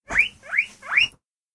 My cousin's guinea pig greeting me to the home.
Addmitedly not a great recording, taken with my phone due to my other equipment breaking down.
animal, chitter, cute, Guinea, Guinea-pig, Guineapig, mammal, pet, rodent, squeak